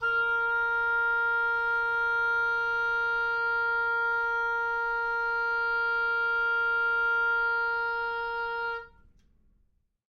One-shot from Versilian Studios Chamber Orchestra 2: Community Edition sampling project.
Instrument family: Woodwinds
Instrument: Oboe
Articulation: sustain
Note: A#4
Midi note: 70
Midi velocity (center): 95
Microphone: 2x Rode NT1-A spaced pair
Performer: Sam Burke